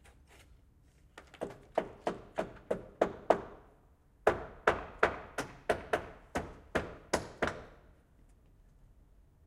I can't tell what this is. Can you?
The house opposite of mine gets a new roof and I have an extra alarm clock. The recorded sound is that of the craftsmen building the wooden construction. Marantz PMD670 with AT826, recorded from some 10 metres away. Unprocessed.
build, building, construction, environmental-sounds-research, field-recording, hammer, hammering, roof, wood